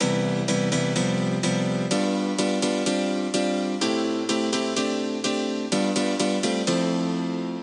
Jazz-E Piano
This is a jazz style piano loop created using Korg M1.